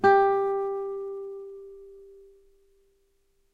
2 octave g, on a nylon strung guitar. belongs to samplepack "Notes on nylon guitar".